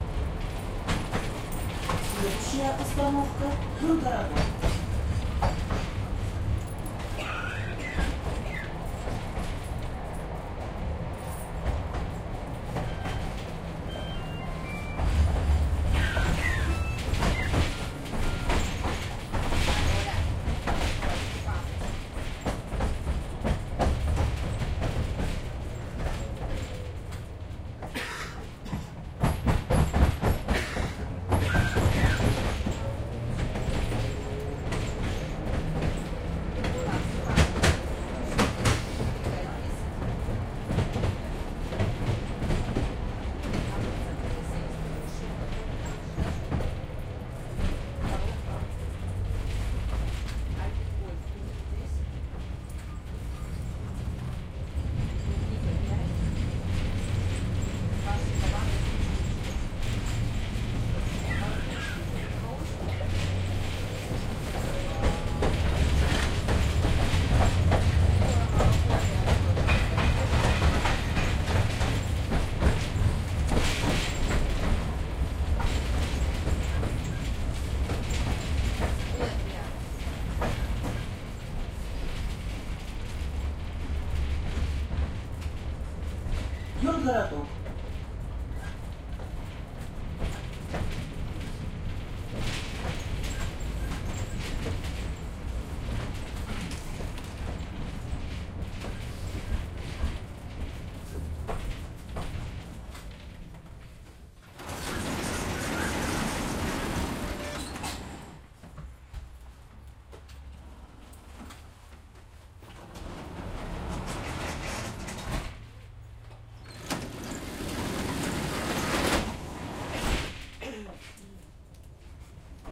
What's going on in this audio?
Old surface car crosses the street.
Recorded 2012-09-25 02:15 pm.
AB-stereo